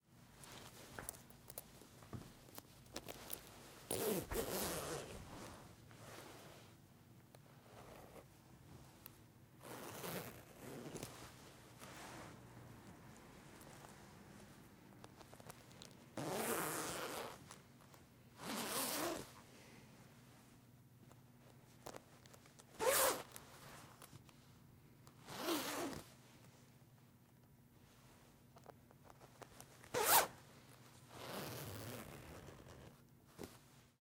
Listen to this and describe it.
Me zipping and unzipping my jacket.
coat, jacket, unzip, unzipping, zip, zipper, zipping